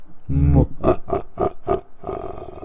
evil laughA
I MUST state i do not agree with/participate in/or condone actual animal harm, the mod is dark humour and the samples reflect that, i hope the samples may be of use to others (i have no idea in what context they would be but hay who knows!)
this one is another evil laugh